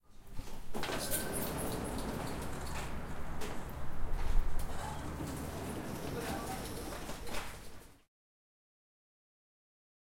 10 - automatic door
Automatic door opening and closing.
Recorded on Zoom H4n.
Close perspective, inside.
automaticdoor, closing, CZ, Czech, door, opening, Pansk, Panska, shopping, shoppingcentre